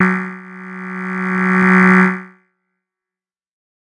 This is one of a multisapled pack.
The samples are every semitone for 2 octaves.